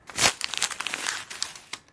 paper crush slow
Long length of crushing paper.
crumple, crush, paper